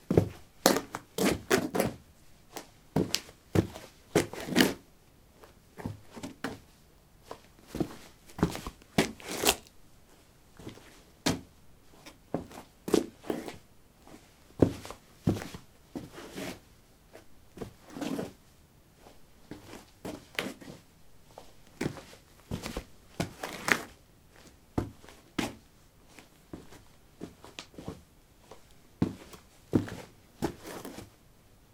concrete 15b darkshoes shuffle
Shuffling on concrete: dark shoes. Recorded with a ZOOM H2 in a basement of a house, normalized with Audacity.
step, steps, footstep, footsteps